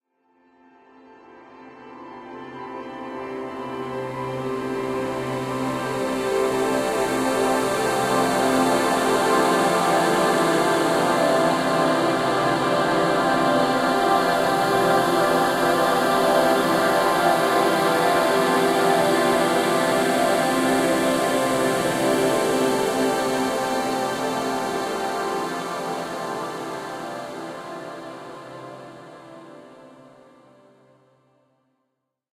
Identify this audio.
I had cause to record a duet of Twinkle Twinkle Little Star (piano + male and female voices)- this is the song heavily processed using FL Studio's Edision audio editor.